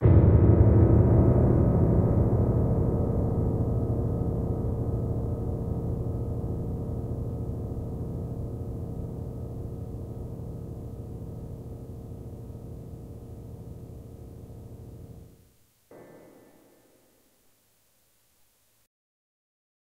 Creepy Piano Rumble
Low rumble of the first 6 keys on a Casio PX 150 digital piano being pressed simultaneously. Kinda creepy sounding. Recorded plugged straight into a Zoom H4N.
hit, piano, creepy, keyboard, dark, horror, ominous, scary, rumble, evil